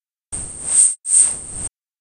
Pod Bay Doors
The short sound of a spaceship door opening, then closing.
Door-closing, Door-opening, Pod-bay-doors, Spaceship-doors